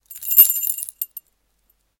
keys rattle3
Rattling bunch of keys being taken out. Recorded with Oktava-102 mic and Behringer UB1202 mixer.
foley, keys, metal, rattle